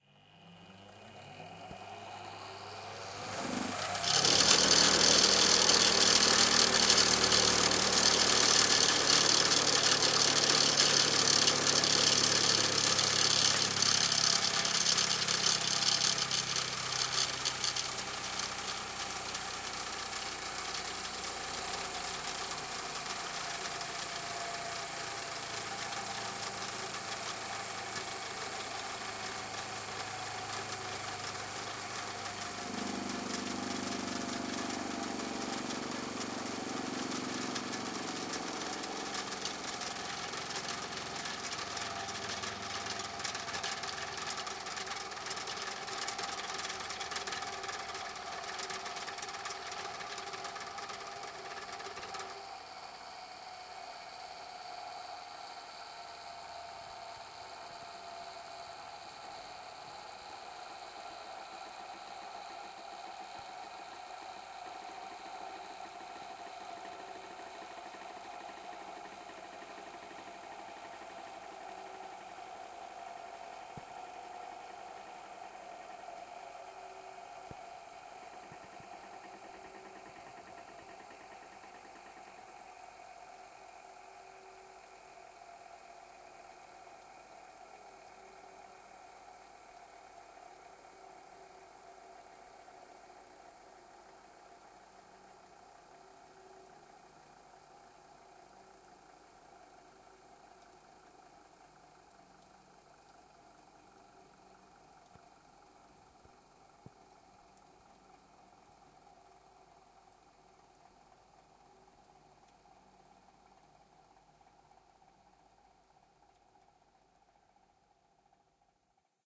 Sander machine motor
This sound was recorded in Laspuña (Huesca). It was recorded with a Zoom H2 recorder. The sound consists on a sander machine being turned on, then we hear the motor and finally the machine is turned off.